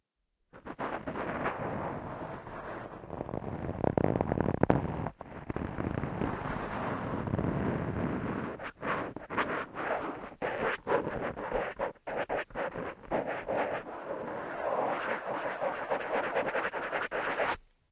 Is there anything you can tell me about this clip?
Foam Microphone Wind Screen 02
ASMR, foam, handling, pulling, scrape, scraping, scratch, scratching, touching